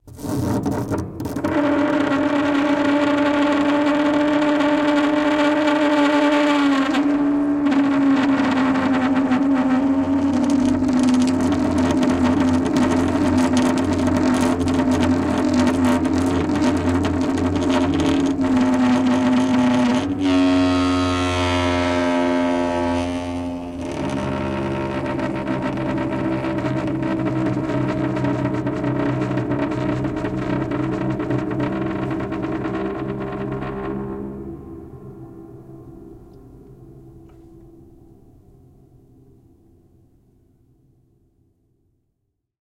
burning iceberg
recordings of a grand piano, undergoing abuse with dry ice on the strings
screech, abuse, dry, ice, piano, scratch, torture